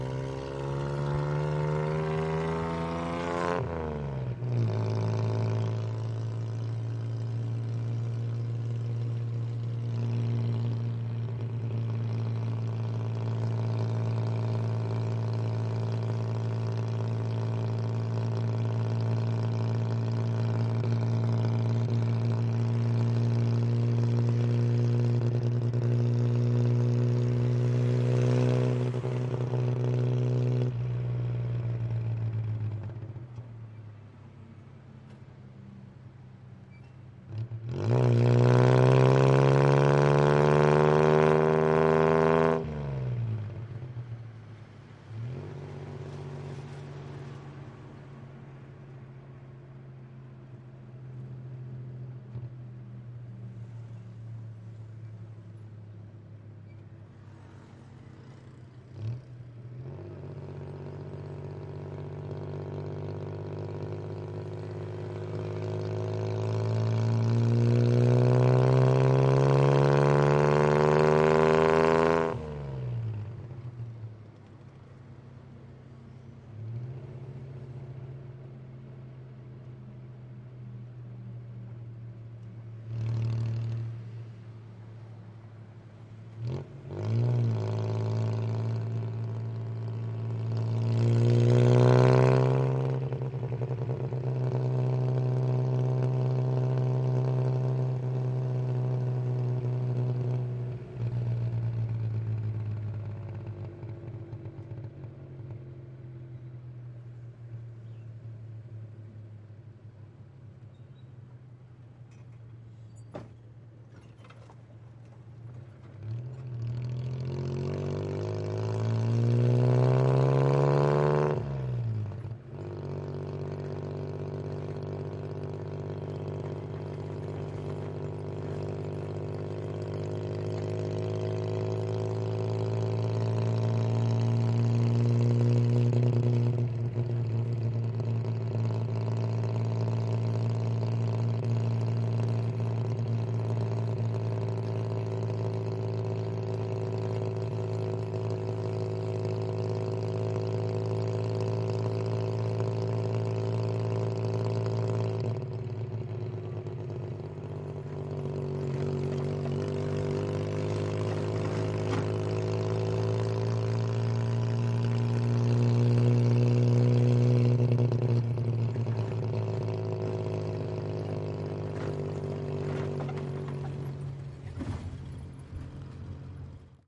exhaust pipe from a tuk tuk in Lao